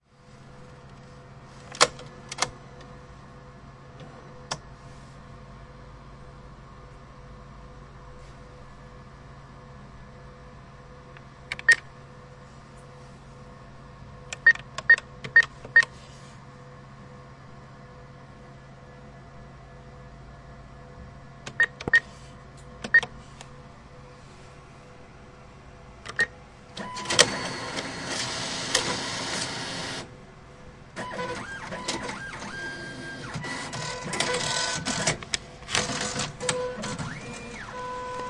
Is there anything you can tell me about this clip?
using a cash machine ATM
inserting a cash card into the cash machine, pressing some buttons and entering the code, receiving money